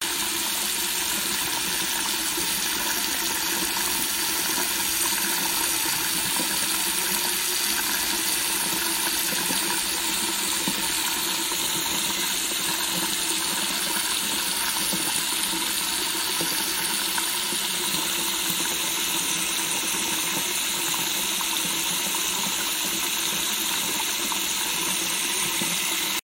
Made this with my bathroom water faucet.
drip, bath, bathroom, water, faucet, drain, sink